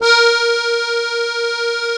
real acc sound
classical, accordeon, keys